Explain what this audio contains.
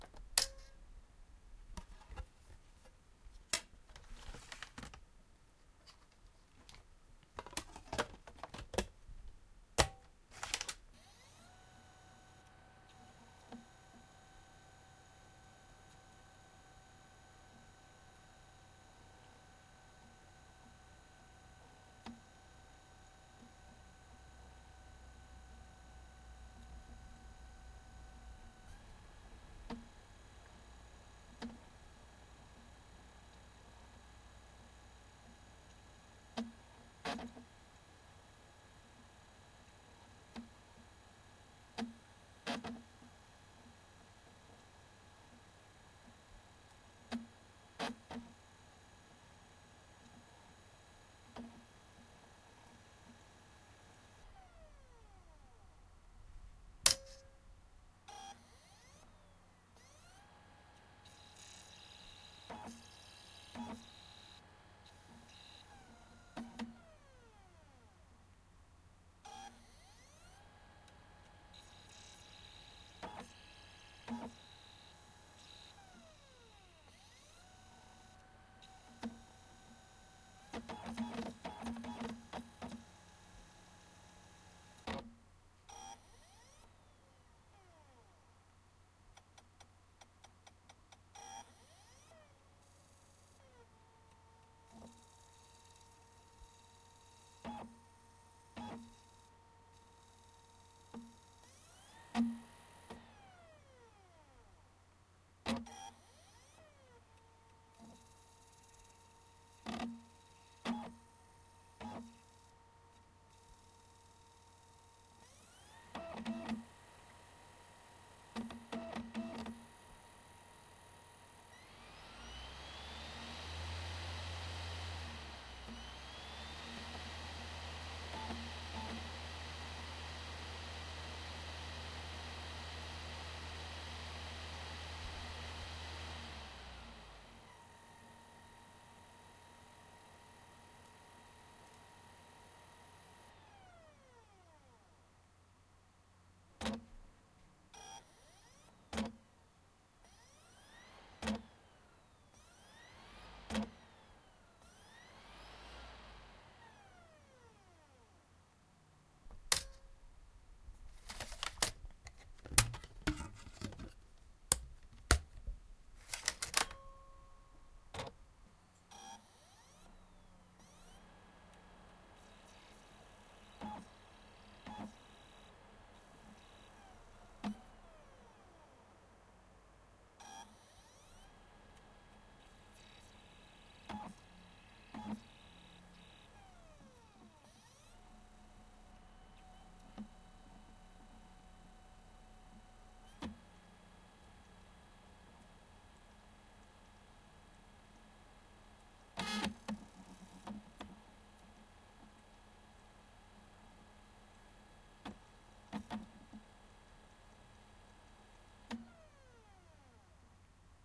Sounds of CD ram on my HP laptop. It is quite broken and sometimes it doesn't work at all. So I inserted some CD's and DVD's and here is the sound.